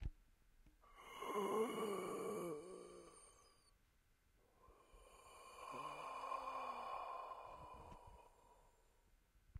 Zombie breathing
a breath of a zombie
suspense, human, soundeffect, fx, people, air, wind, shock, shocked, stuffy, noise, voice, experimental, anaerobic, breath, zombie, vocal, artificial, deep, scary, tension, speech, regular, horror, weird, breathing, internal, hypoventilation